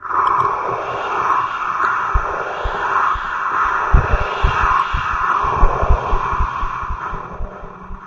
TARDIS-like Noise
A TARDIS like sound effect, created using heavy breathing and lots of mixing!